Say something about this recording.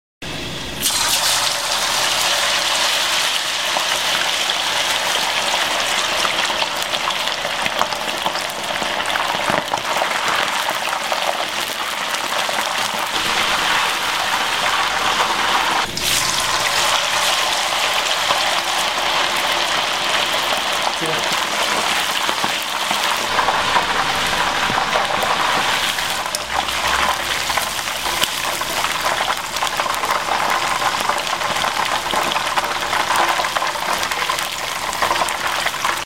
FRYING SOUND EFFECT
You are welcome to use this sound any way you like.
Thanks!
Frying sound hot oil sound
frying chicken
fried chicken popcorn
hot-oil, fried-chicken, oil, Frying-chicken, frying, fry